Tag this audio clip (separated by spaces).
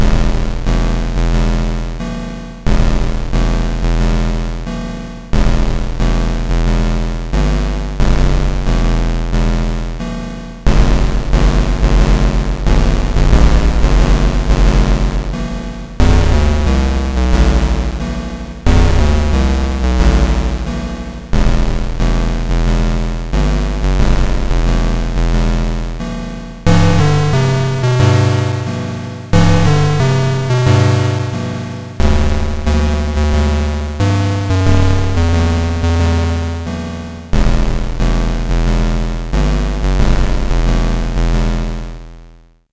wave funeral chopin square digital march 8-bit-sound tracker